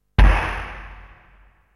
industrial low flash13
industrial low flash
flash, industrial, low